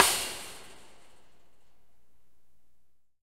hit - metallic - trailer - back of metal trailer 01
Hitting the back grate of a metal trailer with a wooden rod.